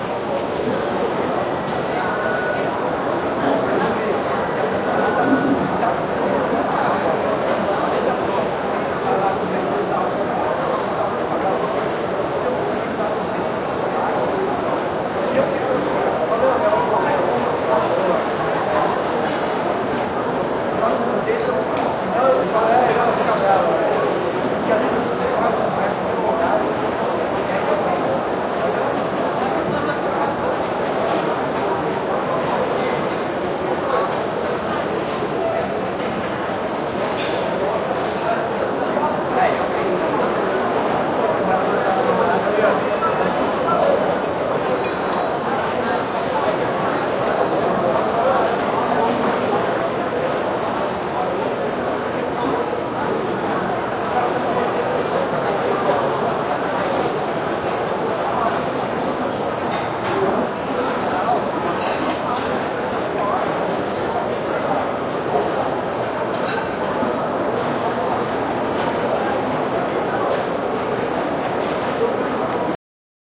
praca alimen shopping
mall, restaurant, shopping, walla